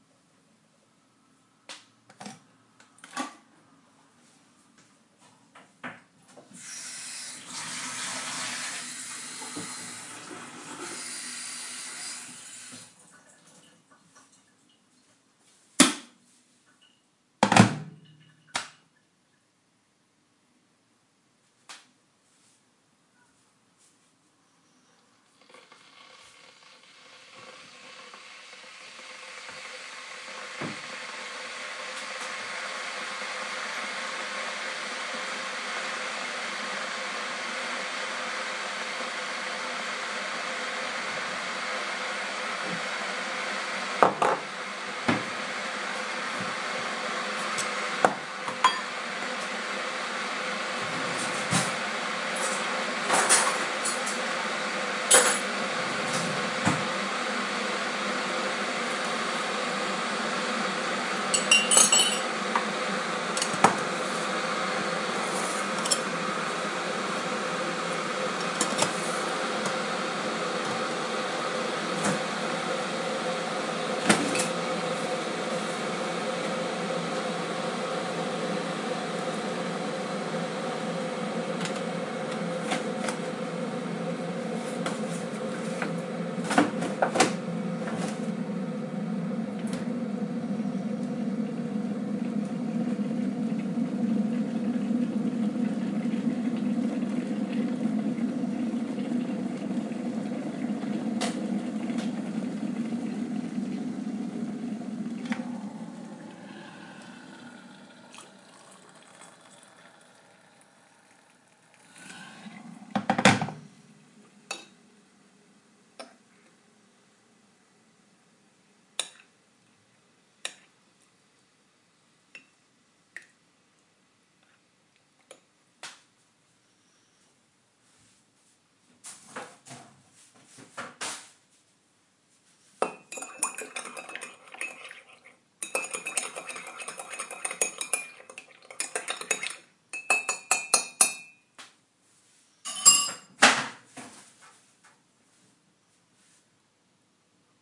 Making Tea
Boiling Kettle and making a cup of Tea
Recorded on an iPhone 4S with a Tascam iM2 Mic using Audioshare App
Kitchen, Tascam-iM2, Tea, Boiling-Water, iPhone-4s, AudioShare, Glasgow, Kettle